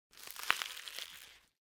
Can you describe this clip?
Crispy Spring Onion 3
Crispy spring onion sound, suitable for sound effects. Recorded with a Sennheiser MKH60 microphone.
audio, bone, break, breaks, chop, Crispy, cut, effects, foley, fresh, gore, gross, horror, kitchen, knife, ninja, Onion, pro, rip, sennheiser, slice, sound, Spring, tear, vegetable, vegetables